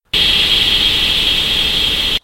Original track has been recorded by Sony IC Recorder and it has been edited in Audacity by this effects: Paulstretch.